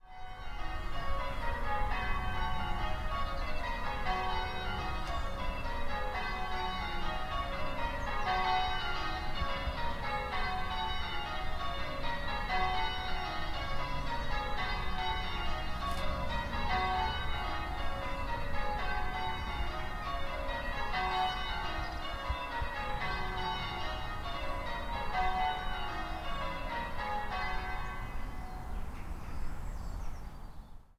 Church Bells, Distant, A
Raw audio of church bells ringing in the distance from "All Saints, Witley". Captures the end of the ringing session, allowing the bells to fade out and the regular ambiences to intervene.
An example of how you might credit is by putting this in the description/credits:
The sound was recorded using a "H1 Zoom recorder" on 31st December 2016.